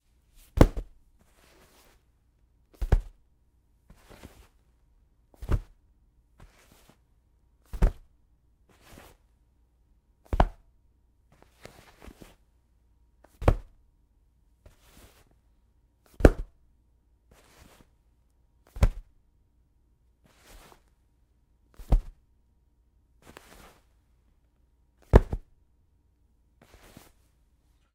Tossing a hardcover book on a bed.